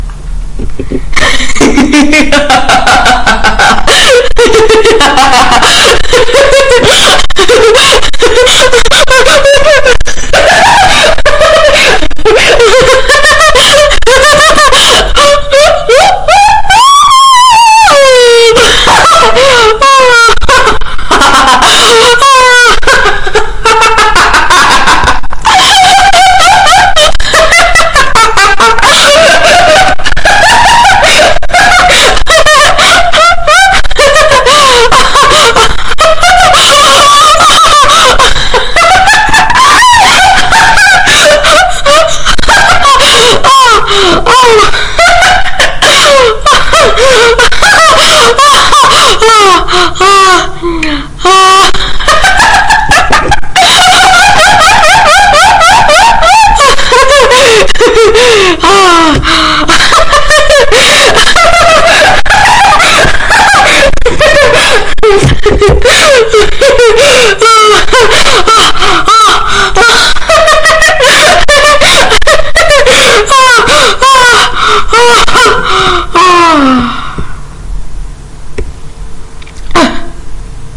WARNING: THIS AUDIO IS VERY LOUD.
“Perfect” laugh for horror games!